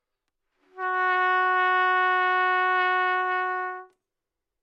Trumpet - Fsharp4
Part of the Good-sounds dataset of monophonic instrumental sounds.
instrument::trumpet
note::Fsharp
octave::4
midi note::54
good-sounds-id::2861